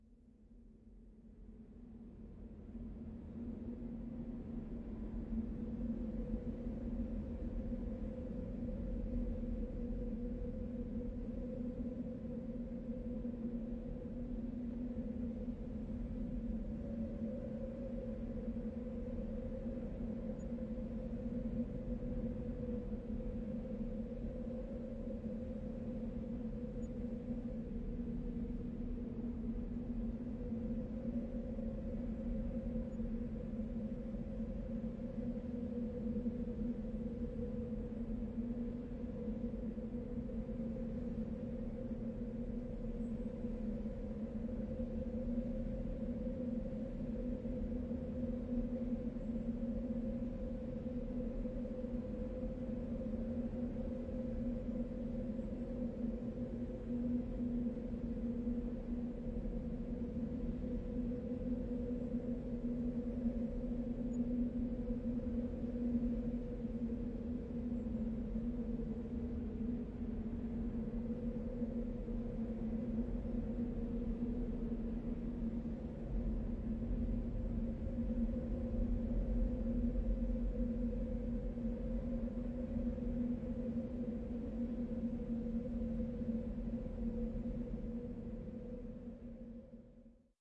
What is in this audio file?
static environment that gives the feeling of a horror tunnel
a, ambience, dark, environment, feeling, gives, horror, static, tunnel